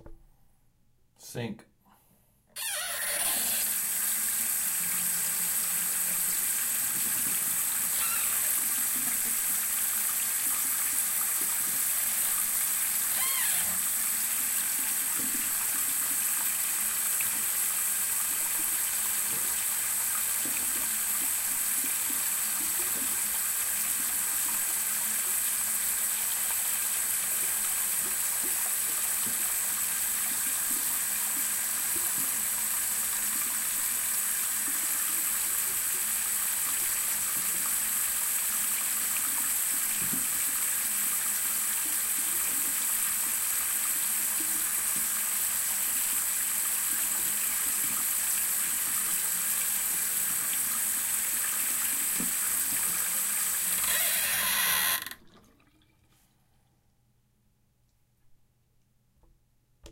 sink run1
drain, faucet, sink, running, bathroom, bathtub, water, bath